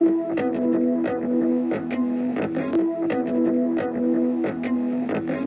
Guitar chord randomly quantize
loop, bpm, gtr, 120, guitar